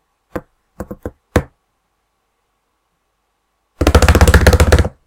Hits on the table
Just me hitting the table.
bang, blow, hits